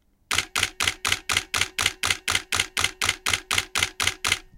Nikon D800 Shutter 1 8000 continuous Sec with Lens
The Sound of the Nikon D800 Shutter.
With Lens
Shutterspeed: 1 / 8000
Continuous
D800, Lens, Mirror, Shutter, Sound, Speed